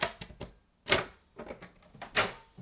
Opening a large metal latch
buzz, latch, machine, mechanical, whir